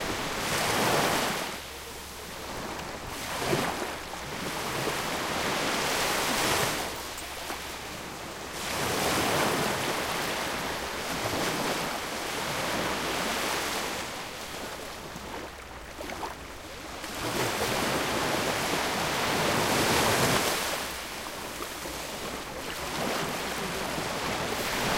Baltic Sea 2
water, wave, sea